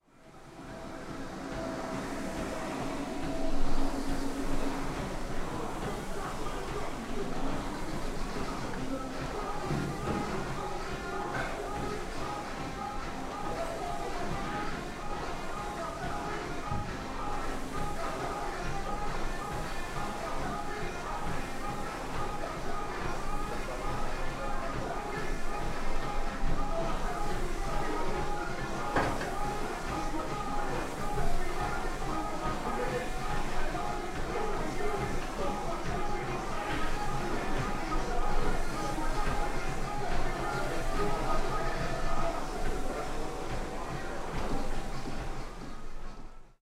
Prague gym ambience
excercise, gym, general-noise, atmosphere, ambience, background, background-sound